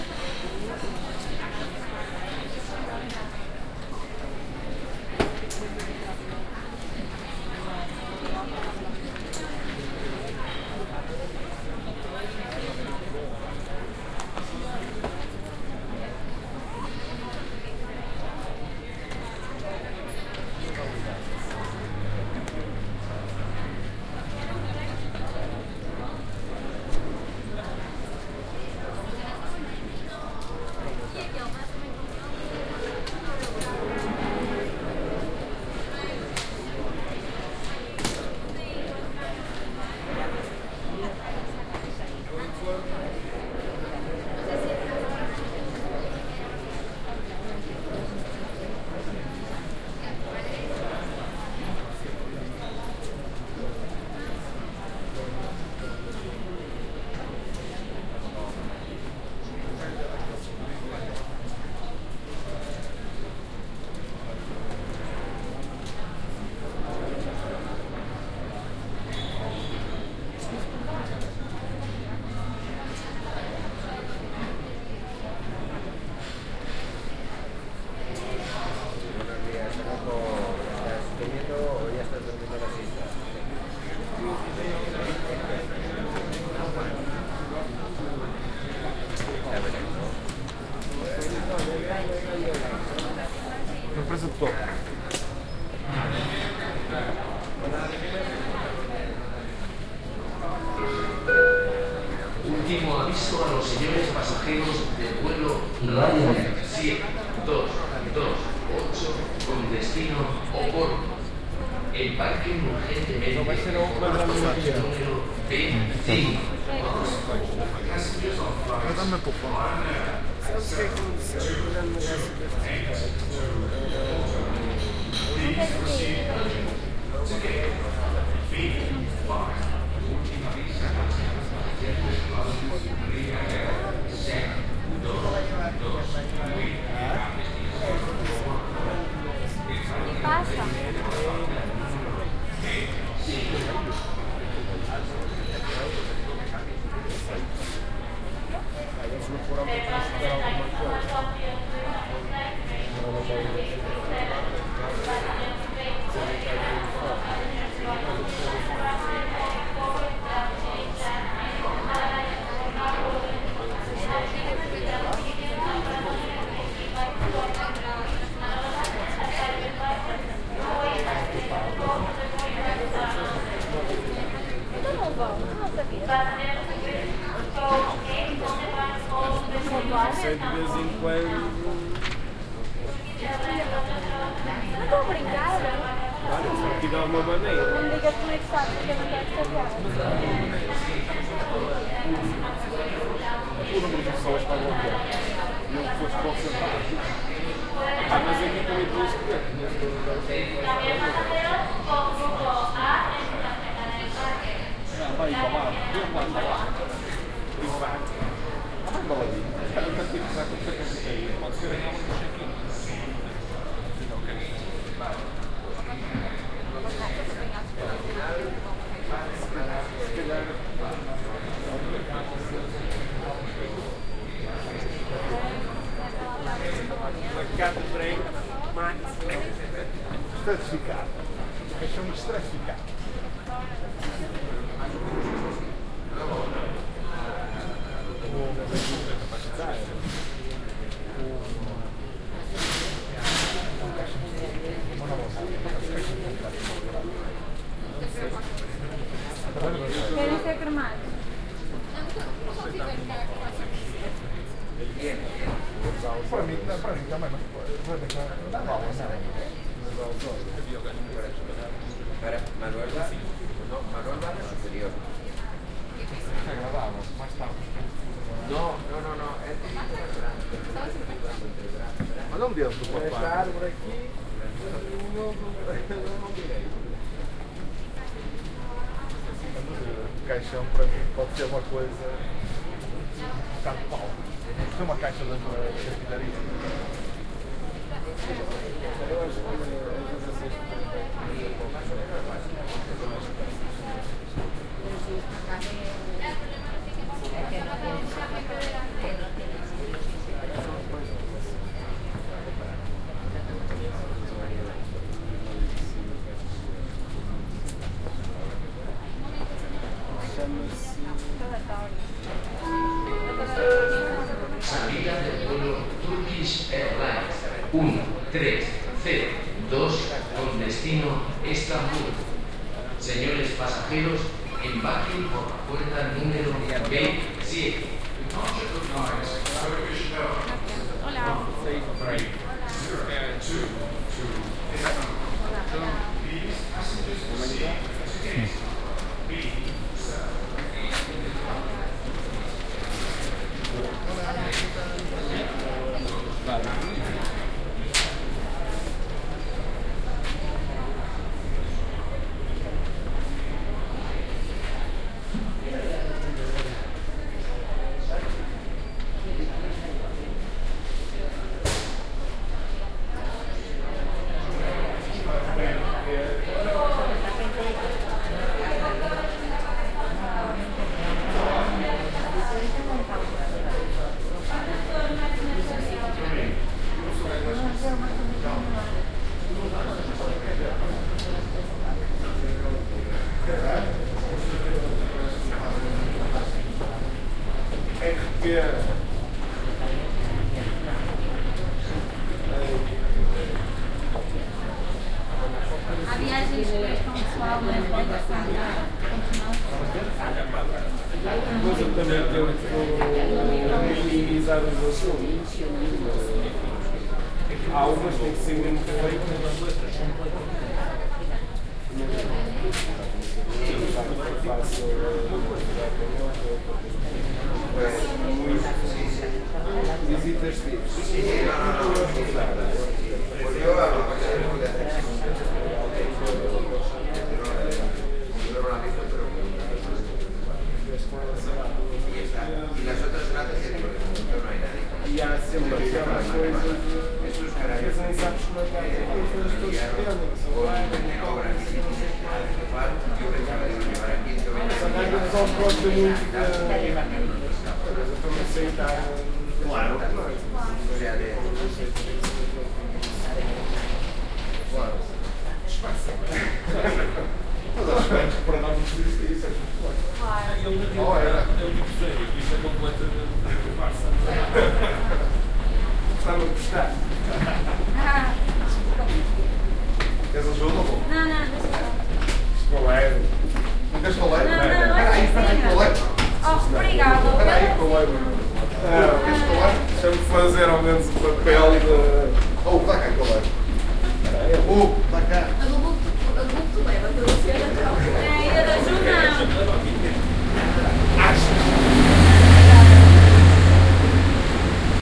Valencia Manises airport departure 1- waiting room flight calls
In the waiting room at Valencia Airport Manises, chatter from the crowd (Spanish, Portuguese and other languages), several announcements for the flight departure of Ryanair to Porto
Then there is the descent through the corridors and stairs down to the flight runways
Recorded with binaural head-worn microphones soundman okm.